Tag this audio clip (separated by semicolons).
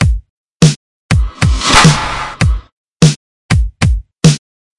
break; matt; loop; electronic; step; skrillex; cat; dollin; dubstep; robotic; Audacity; drum; rats; sound; dub